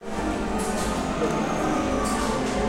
Background noise at the Musee Mecanique on Fisherman's Wharf in San Francisco.
aip09, background, fishermans-wharf, machines, musee-mecanique, people, san-francisco, stanford-university